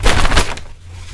a grocery bag being shaken
bag, paper